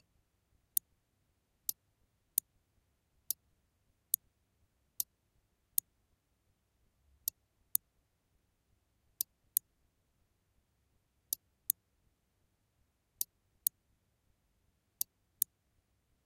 A relay is an electrically operated switch, recorded with a Zoom H1.
electric, electronic, mechanical, relay, switch